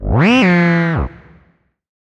Moon Fauna - 60
Some synthetic animal vocalizations for you. Hop on your pitch bend wheel and make them even stranger. Distort them and freak out your neighbors.
alien, animal, creature, fauna, sci-fi, sfx, sound-effect, synthetic, vocalization